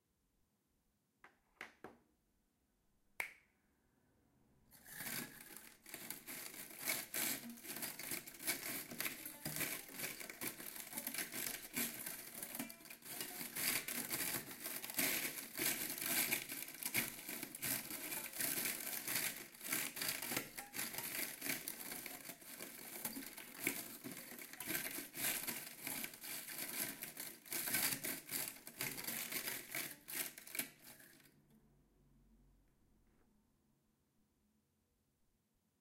brushed hommel
This is a raw recording of a steelbrush being forced and rubbed over strings of an 17th Century Hommel Replica.
abstract, hommel, improvisation, steelbrush